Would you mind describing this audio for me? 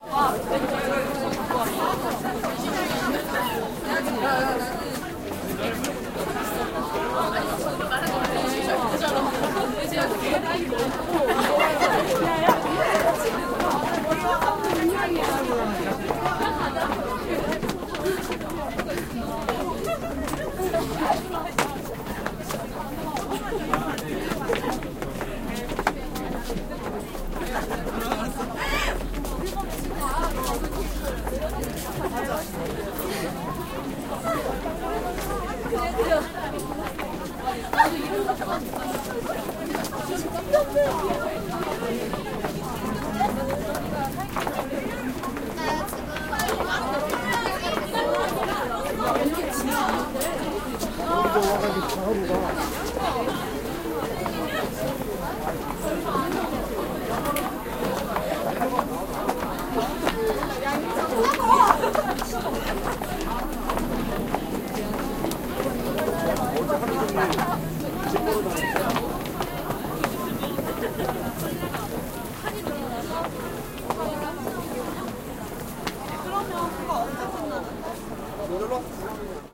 0177 Hongdae metro stairs
People in the stairs of the Hongdae metro station.
20120212